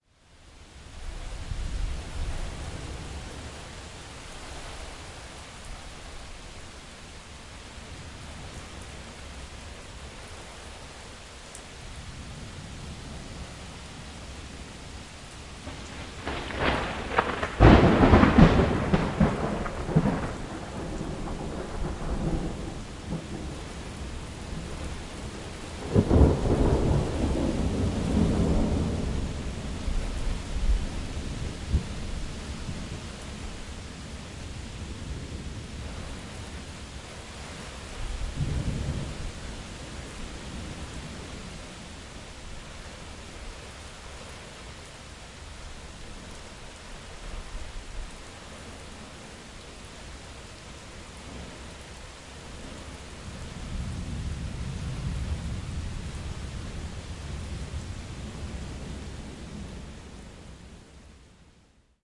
Nice crack of thunder - Recorded on June 18th 2006 in North Texas with Sony ECM-99 to Sony MD